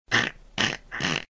I couldn't find any SFX of a car jack, so i made one with my voice.
car, creak, jack, voice